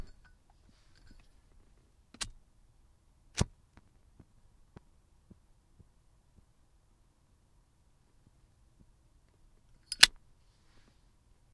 zippo2strike
a zippo is opened and struck
flame, lighter, zippo